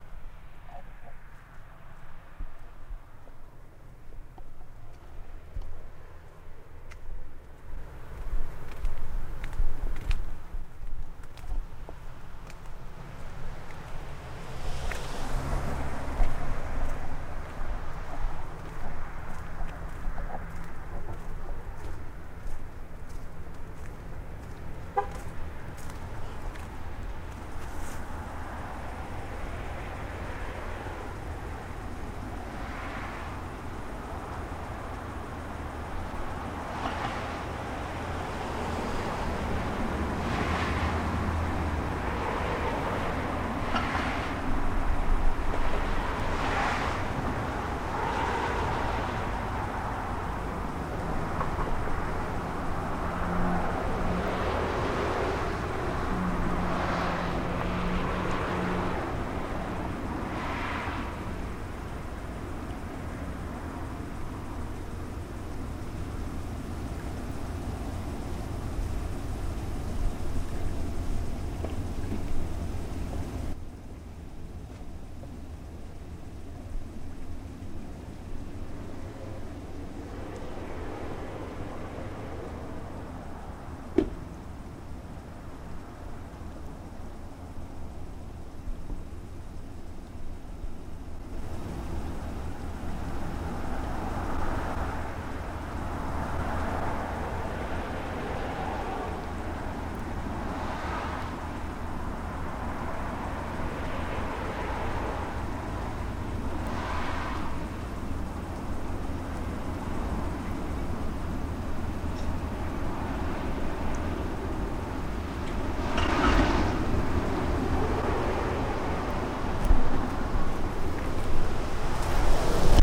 ZOOM0002 Tr1
Stroll down the street
leaves, Stroll